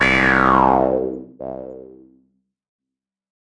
Blip Random: C2 note, random short blip sounds from Synplant. Sampled into Ableton as atonal as possible with a bit of effects, compression using PSP Compressor2 and PSP Warmer. Random seeds in Synplant, and very little other effects used. Crazy sounds is what I do.
110, acid, blip, bounce, bpm, club, dance, dark, effect, electro, electronic, glitch, glitch-hop, hardcore, house, lead, noise, porn-core, processed, random, rave, resonance, sci-fi, sound, synth, synthesizer, techno, trance